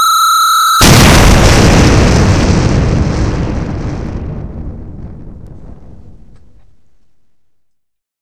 Bomb Explosion / Blow Up / Blowup !
I searched and dug for some time - until I found this useful sound:
[2020-06-15].
Then I used one of the MATRIXXX-bomb explosion sounds and placed it on top of this one! I mixed these sounds to make it even more epic!
If you enjoyed the sound, please STAR, COMMENT, SPREAD THE WORD!🗣 It really helps!
anime, battle, bomb, boom, destruction, detonate, explosion, explosive, film, game, grenade, helicopter, tank, vehicle, war